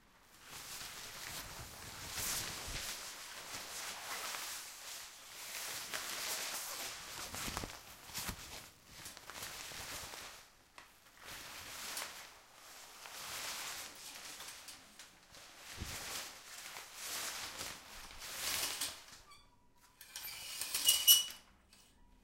the sound of a shower curtain being clenched and pulled around, ending with a creak as it's pulled back
clench, curtain, shower, squeak